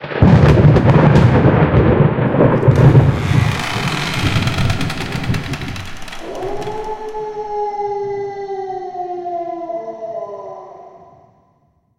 Halloween Werewolf Intro
More spooky Halloween sounds:
Please don't forget to credit TunePocket if you use our sounds. Thank you !
short, spooky, terror, background-sound, dark, scary, drama